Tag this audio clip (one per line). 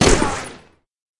sci-fi
weapons